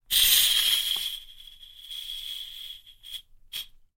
A bicycle pump recorded with a Zoom H6 and a Beyerdynamic MC740.